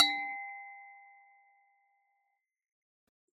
me hitting the edge of an aluminum plate with a pocket knife.